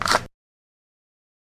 A S&W; 9mm being drawn from a holster.